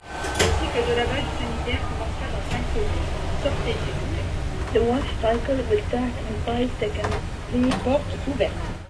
Sanisette wash cycle message
This is the recorded message warning of the wash cycle in a sanisette (a self-cleaning, high-tech street toilet found throughout Paris). It is triggered by opening the door from the inside after using the sanisette. This turns off the hand dryer (heard at the start of the recording) and unlocks and opens the door (also heard). Since the door must be opened to start the recording, it was hard to separate from the voice announcement.
This announcement is made in both English and French, for some reason (perhaps because the door closes and the wash cycle starts even if you don't leave the sanisette!).
recorded-message, sanisette